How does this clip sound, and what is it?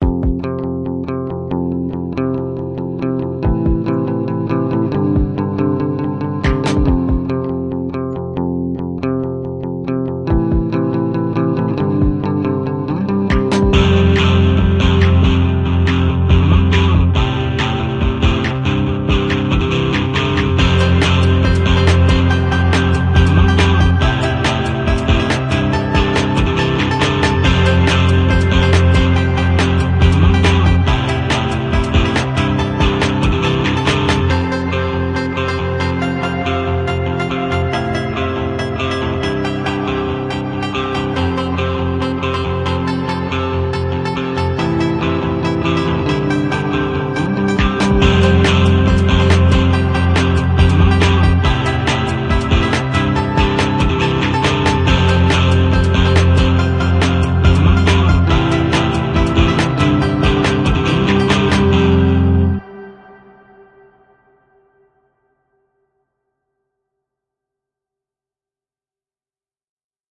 new sss
cool, groovy, song, sound